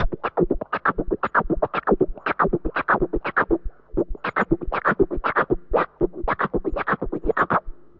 I make a "beat box", (i try it) with a wah wah connected to the mic, it' s like a guitar.